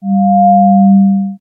slobber bob G2
Multisamples created with Adsynth additive synthesis. Lots of harmonics. File name indicates frequency. G2
additive, bass, free, metallic, multisample, sample, sci-fi, sound, swell, synthesis